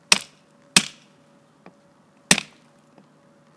Small sledge hammer striking a chisel cutting into hardened cement, syncopated rhythmic loop.